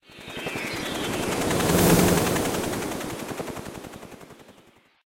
Magic Wings - Soft
That's created with the idea for something magical to fly off ground
magic, sparkly, downwards, upwards, fairy, high, chimes, fly, sparkle, wings, spell, bell, pipe, jingle